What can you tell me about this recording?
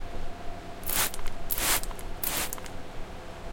spray bottle spraying